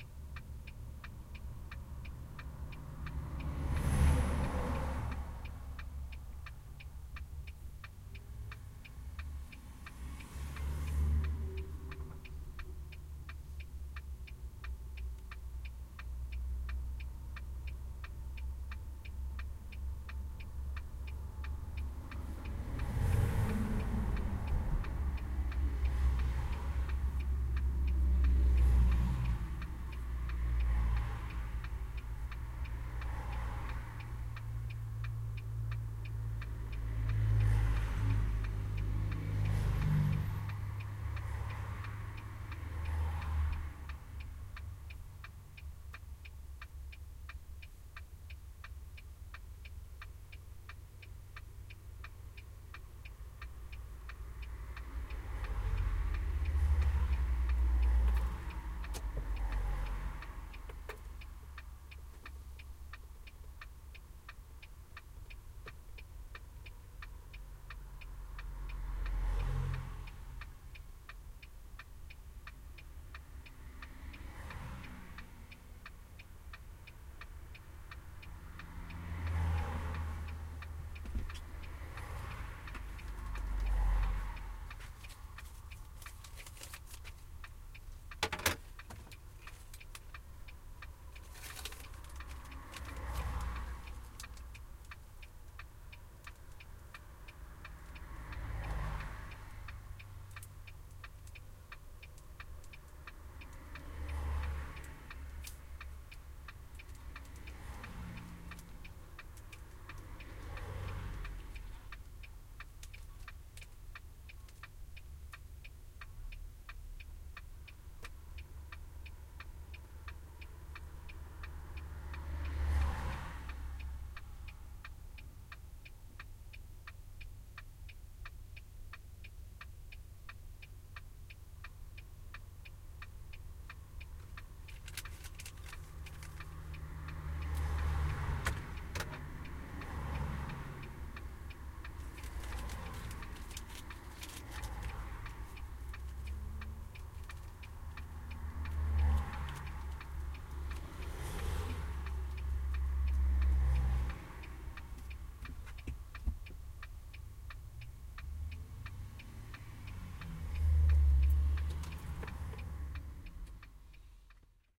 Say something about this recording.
110801-waiting for the elba ferry
01.08.11: the second day of my research on truck drivers culture. standing in a queue to the Elba river ferry. Ambience from the truck cab - passing by cars, some clicks, rustles. Glusckstadt in Germany.
blinker, cars, ckstadt, field-recording, germany, road, traffic, truck, truck-cab